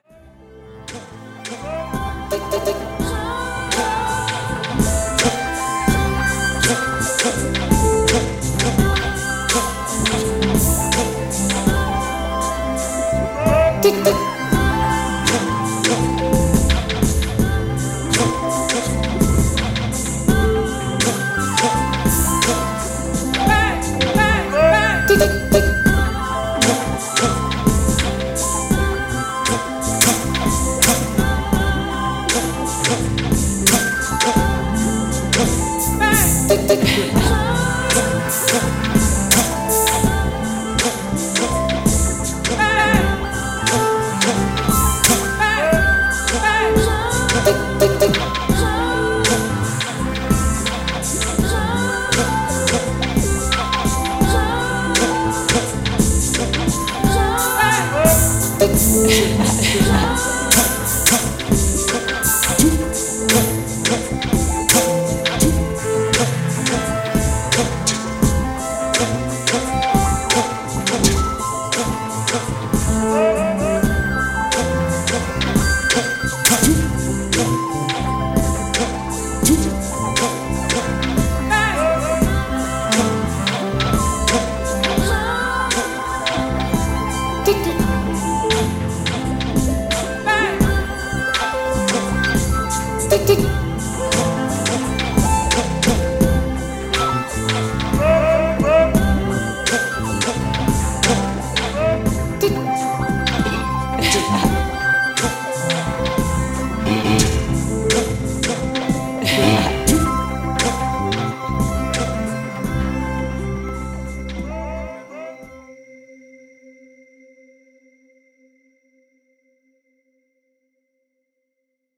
a new gospel
It's like some people getting in a religious state of mind. or something like that...
Made with Roland V-drums and microKorg, recorded with WavePad.